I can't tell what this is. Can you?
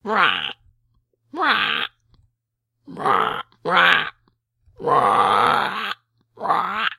weird bird4
making weird sounds while waiting for something to load
animal, bird